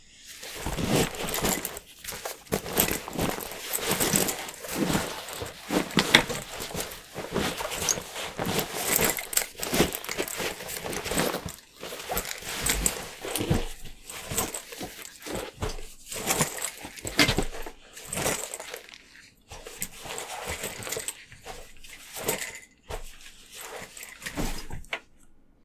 me pulling things out of a large backpack repeatedly to simulate removing some sort of item from a cloth container. recorded at my desk.